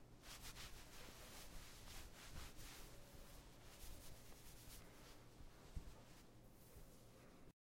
dry with towel
drying hands with towel
drying, towel